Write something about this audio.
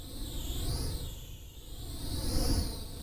hmmmm, pulse wave setting..... and envelope's. w00t.
flyby, whoosh, sfx, synth, space, micron